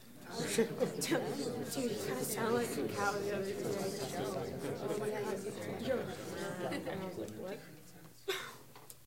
Small audience murmuring